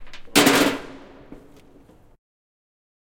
Recorded in an abandoned factory in Dublin.
bang, clang, crash, factory, industrial, metal, noise